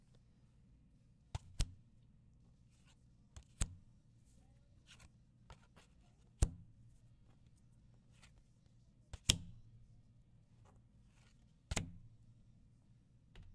card placed on table
a playing card being placed on a table